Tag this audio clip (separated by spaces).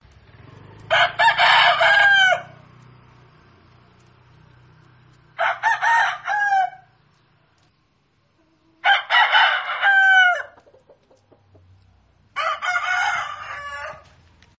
Indonesia Field-Recording Birds Roosters Bali Rooster Call Cock